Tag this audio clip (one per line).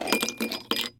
drink
bar
alcohol
glass
ice
ice-cube
cocktail